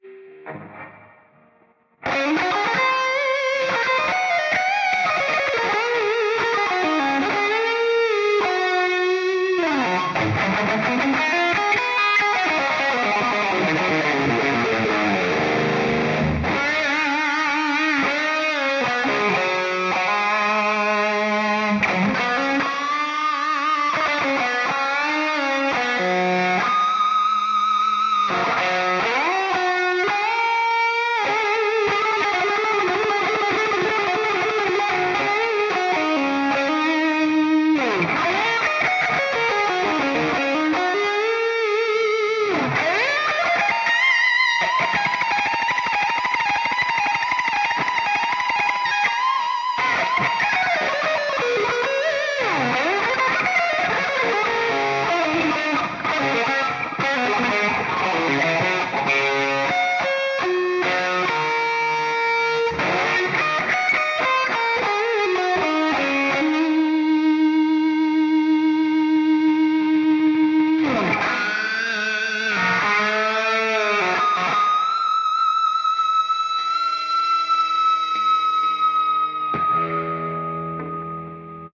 Afternoon guitar doodle
Just some fast random notes and some harmonic squeals. Call it shred or call it noise.
Guitar, fast